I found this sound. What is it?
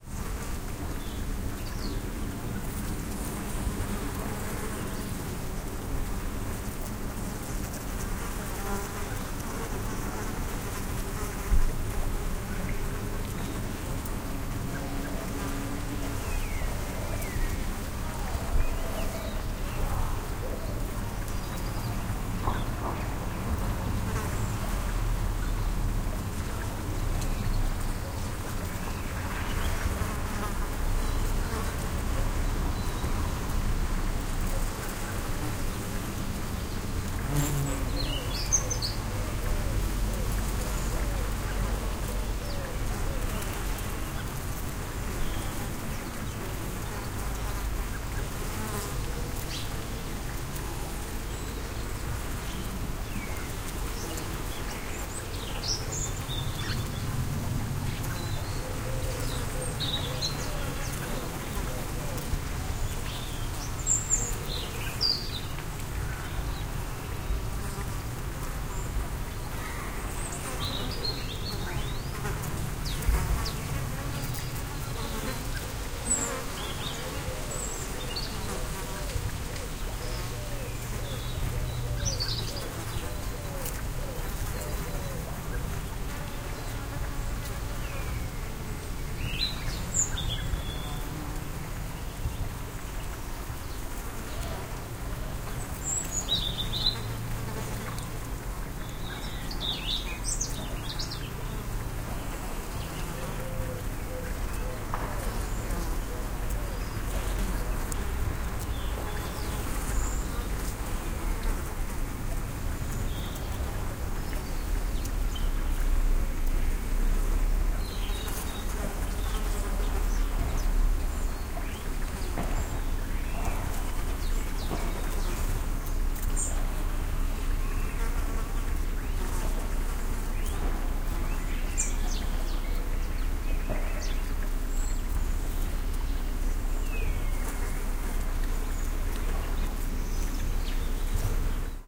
Garden Bees
Ambisonic recording done with a Soundfield SPS200 microphone and Nagra VI recorder, converted to binaural by Harpex (KU100 HRTF).
Bees in a residential garden
birds, ambisonic, bees, ambience, nature, Ambiance, field-recording, SPS200, Soundfield, garden, Binaural, KU100, Nature-sounds